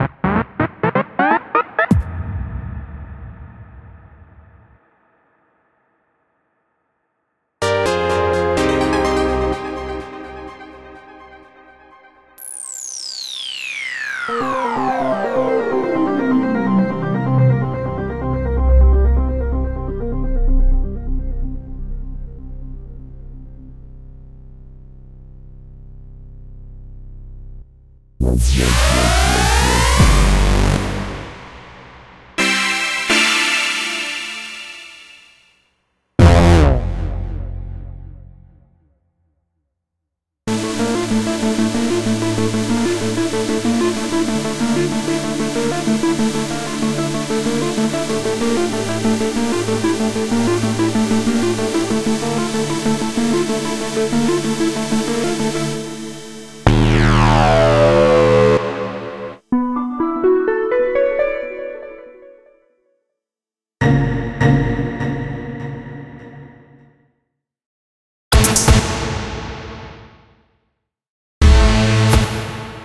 intros,outros and effects.
Sounds by Frankun.
Synth:Ableton live,silenth1.